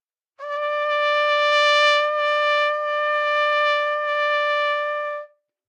overall quality of single note - trumpet - D5
Part of the Good-sounds dataset of monophonic instrumental sounds.
instrument::trumpet
note::D
octave::5
midi note::62
tuning reference::440
good-sounds-id::1196
Intentionally played as an example of bad-dynamics-errors